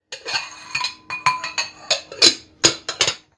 Sonido realizado para el final de la materia Audio 1, creado con foley, editado con reaper y grabado con Lg Magna c90
HouseSounds, Audio1, Reaper